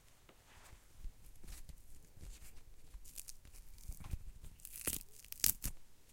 A very dry (not surprisingly) ripping sound. I tear beef jerky very close to two condenser mics. These were recorded for an experiment that is supposed to make apparent the noise inherent in mics and preamps. You can hear the difference in noise levels from the mics, as is one channel the noise is clearly louder.